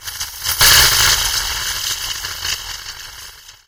Giant Bug Roar
The roar of a giant bug (For a video game)
Bug, Roar